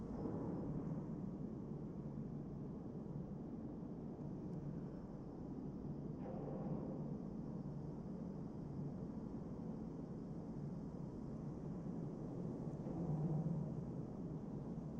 Barrow Guerney Atmosfear
Audio recording from Barrow Guerney Mental Asylum on a fairly apocalyptic day...35 mph winds and flooding.
Processed using Adobe Audition.
Ambience, Room, Horror, Tone